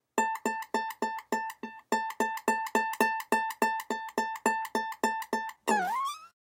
The sound that a comic makes when a character is looking around.